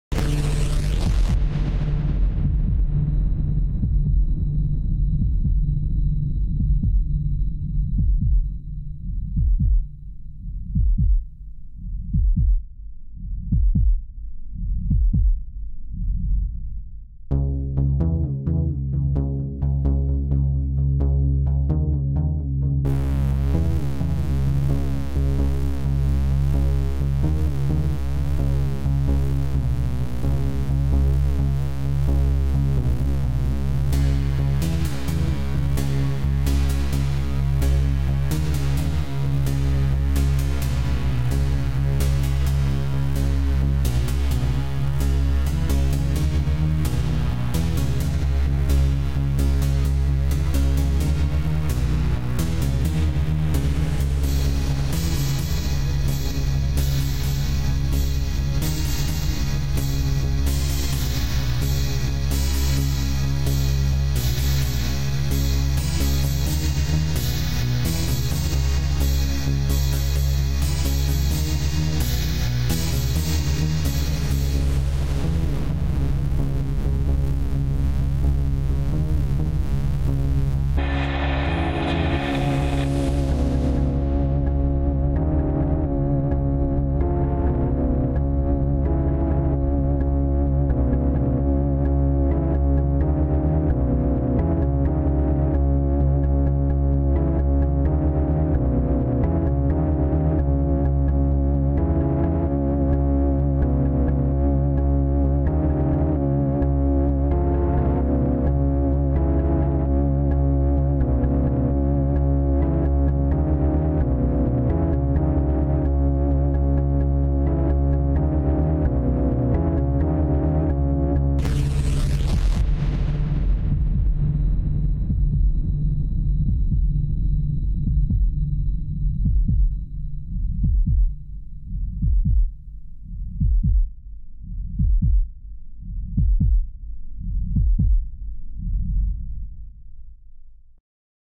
21 years old
wave, radio, sounds, future, star, SUN, space